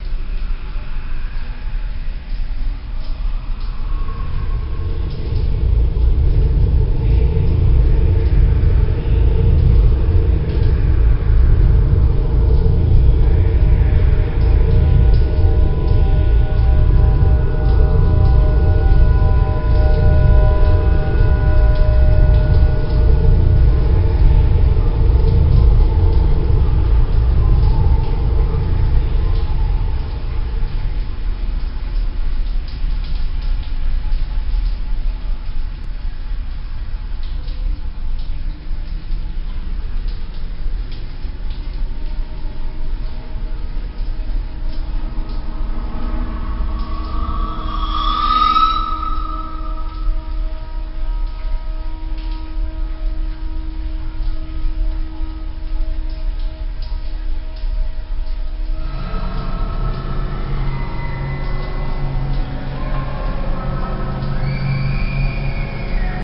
A short clip from last years CD I made for Halloween. I few years ago I got tired of the cheesy Halloween CD's out there so I made my own for family and friends... The whole track is an hour long. If anyone wants it (it's for free) just ask, I'll send you a link..
Background-Ambiance, Negative, Spooky